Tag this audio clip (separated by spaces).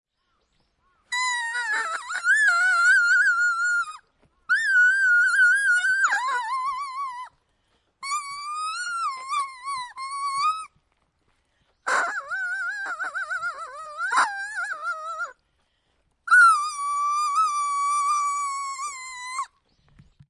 countryside Japan kashiwa leafblowing leafwhistle music reed rural